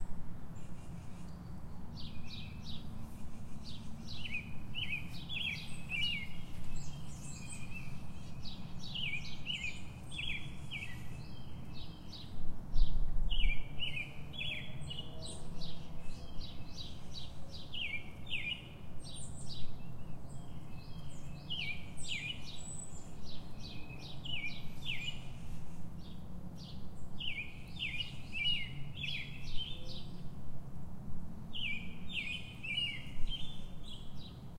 Bird Singing -07
Birds singing outside my window on a Tuesday morning in Northern Virginia. Recorded with a Tuscan DR-40.
spring, singing, nature